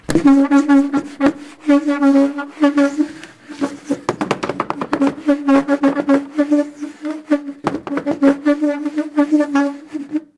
Chair Sliding Quickly
I'm sliding a chair over the floor in rapid motions. Recorded with Edirol R-1 & Sennheiser ME66.
bee, bees, chair, flies, fly, glide, gliding, mosquito, mug, nest, noise, noisy, quickly, rapidly, slide, sliding, squeak, squeaking, squeaks, squeaky, wasp, wasps